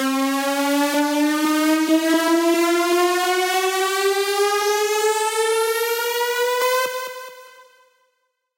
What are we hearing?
Simple rise 140 BPM (With Tail)
A simple rise created using the Nord 2X and third party effects.